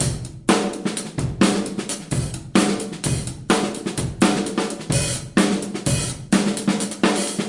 breakbeat loop 2 4bars 128bpm
4 bar breakbeat/drumgroove. Seamless loop, 128bpm.
Roomy recording of a trashy drumkit from a music school, recorded with a Zoom H4.
break, improvised, funky, drumset, percussion, groove, groovy, breakbeat, drum, 128bpm, drumming, drums, drum-loop, beat, loop, trashy, rhythm